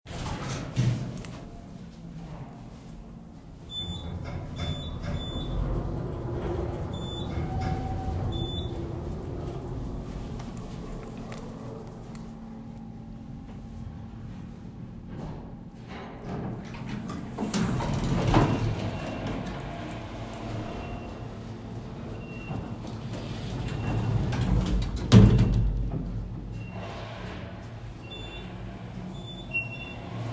Elevator Noise - Attempt 2
Elevator ding and doors opening
closing, college, commercial-elevator, ding, door, doors, dorm-building, elevator, lift, machinery, opening